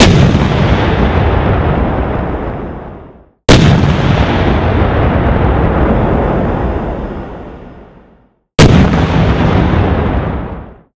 Tank Shots
army, fire, firing, shooting, tank, technology, war